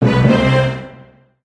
Stereotypical drama sounds. THE classic two are Dramatic_1 and Dramatic_2 in this series.
cinema, drama, film